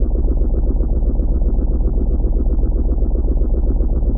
Synthetic Submarine Rotor
filtered (low pass) rotor sound
4x looped bubble sound each with different pitch shift
acid, bubble, bubbles, bubbly, engine, rotor, submarine, submerged, synth, under-water, underwater